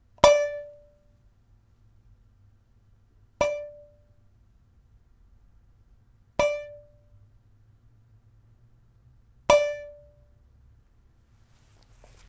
A half-filled metal thermos being struck.
idiophone, hit, tap, struck, metal, percussion, ring, ping, foley